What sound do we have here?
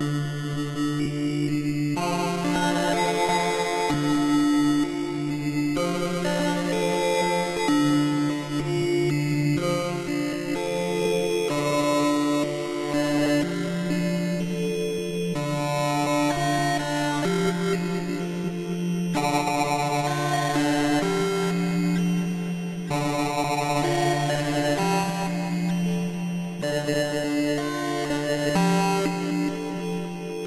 A sequence using vocal filters and formant oscillators played on a Nord Modular synth.